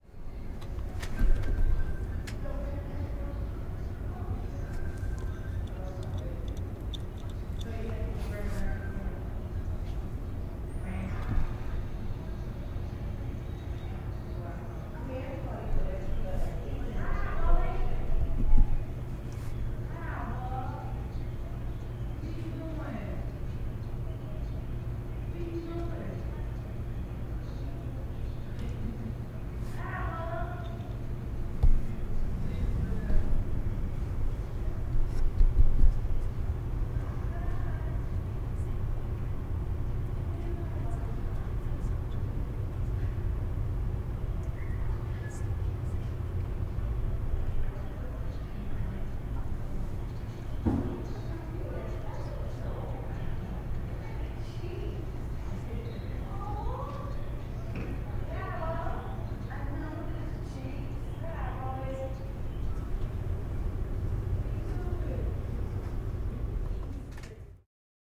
Academic Hall Atmosphere
The sound of a not-so-busy academic hall.
academic, atmosphere, hall, sound, wild